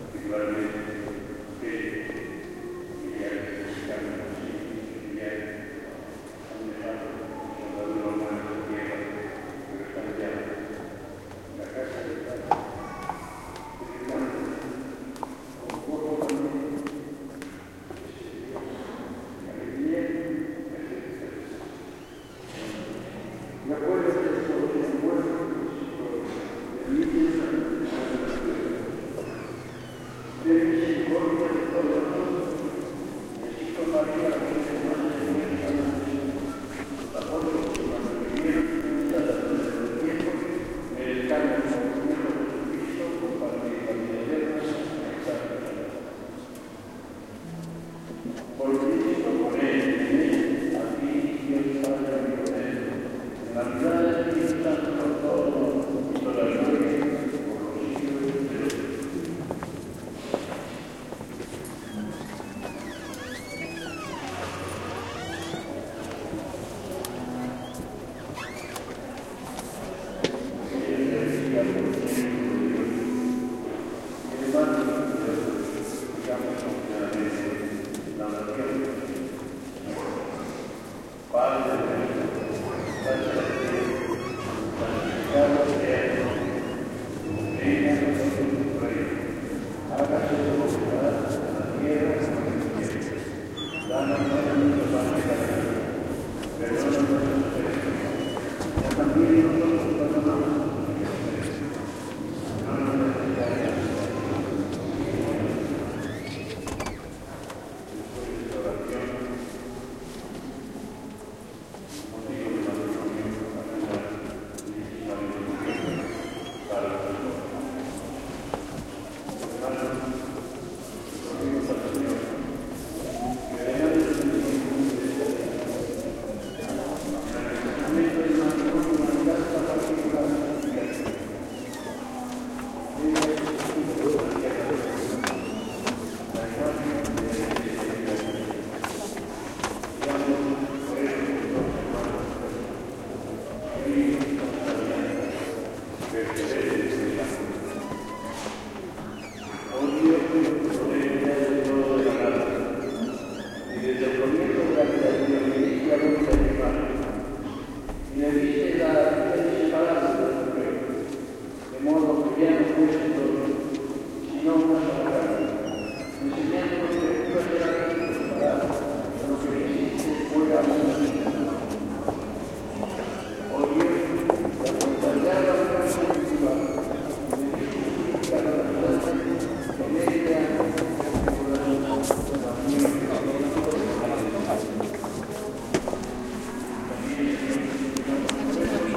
20101023.santa.ana.church
ambiance of catholic church during ceremony, with (amplified) voice speaking in Spanish, reverberant steps, murmur of praying, and a squeaky door that tourists open /close again and again. Recorded at Church of Santa Ana, Granada, Spain, with Shure WL183 pair into Olympus LS10 recorder
ambiance, ceremony, church, door, field-recording, squeak